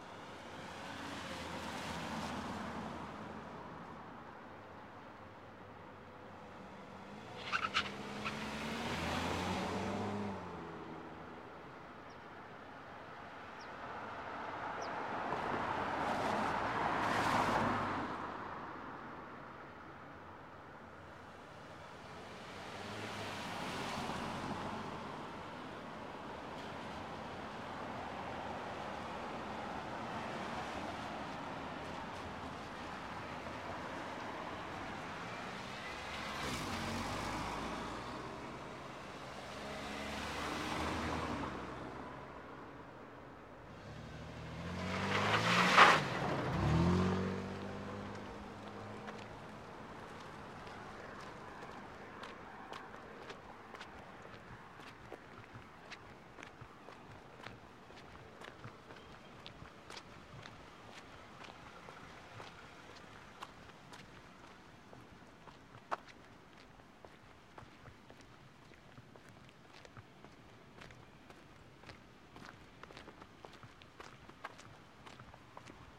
Intersection Wet
Wet Intersection car-bys
Footsteps, Outdoor, Field-Recording, Wet, Intersection, Car-by